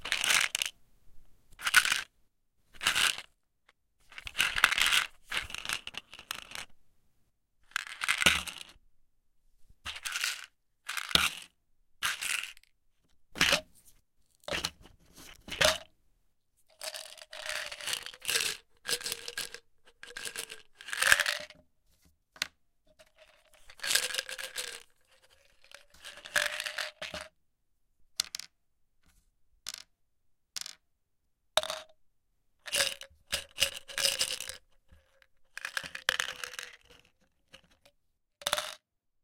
So my doctor prescribed me an awesome bottle of penicillin. I recorded myself picking it up, shaking it, and dropping a single pill. Extract what you wish from it.